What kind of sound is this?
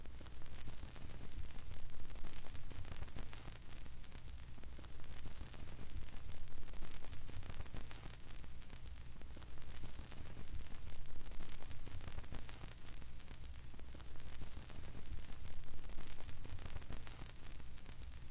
Vinyl Surface Noise
A short sample of the background surface noise from a vinyl record.
noise, record, surface, turntable, vinyl